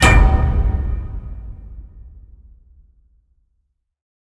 The sound of a cinematic fat hit with a metal top. The sound consists of a set of recordings of hits against a metal oil heater, two synthesized hits, and several metal hits that I got from recordings of the clicking of a folding sofa mechanism. All layers have been pitch-changed. In layers with a metal top, the acoustics of a large hangar are added by a convolution reverb. There is also a lot of EQ, compression, exciter, limiting. Enjoy it. If it does not bother you, share links to your work where this sound was used.